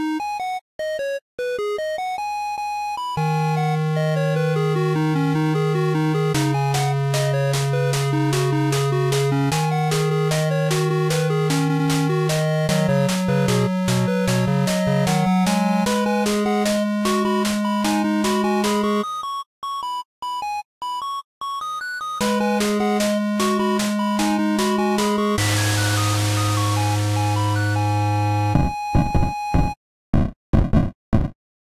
Pixel Song #15
Music, Pixel, short